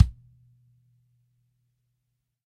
Kick-Coin-PlasticBeater-Hard2
These are samples I have recorded in my rehearsal room/studio. It's not a fancy studio, but it's something. Each drum is recorded with an SM57 on the top head and an SM58 on the resonant head, which have been mixed together with no phase issues. These samples are unprocessed, except for the kick drum which has had a slight boost in the 80hz region for about +3db to bring out that "in your chest" bass. The samples are originally intended to be used for blending in on recorded drums, hence why there aren't so many variations of the strokes, but I guess you could also use it for pure drum programming if you settle for a not so extremely dynamic and varied drum play/feel. Enjoy these samples, and keep up the good work everyone!
24, bass, bit, dogantimur, drum, erkan, floor, hard, instrument, kick, medium, recorded, sample, snare, soft, studio, tom, unprocessed